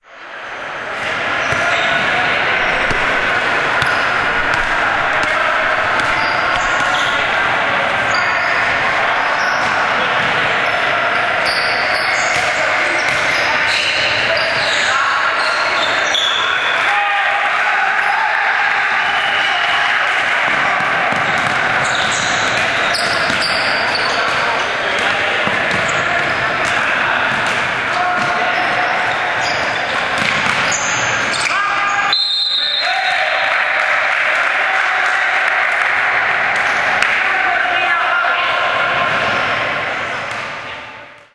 This sound was recorded with an Olympus WS-550M and it's the sound of a basketball match between CB Adepaf and CB Salt from the Junior Male's category.